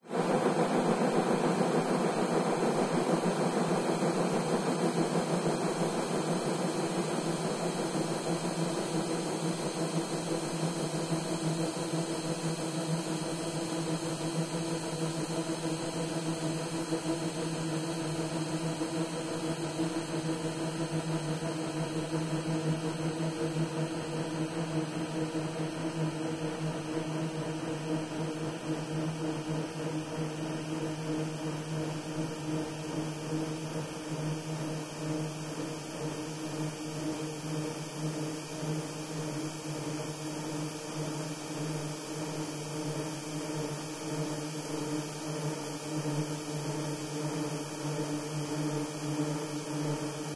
Almost illegal, horrifying and purely evil noises created by paulstretch extreme stretching software to create spooky noises for haunted houses, alien encounters, weird fantasies, etc.
alien, evil, ghost, haunting, horror, paranormal, scary, stretch